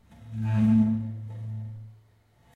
Queneau Grince Chaise Table 04
frottement grincement d'une chaise sur le sol
classroom, dragging, desk, table, drag, floor, chair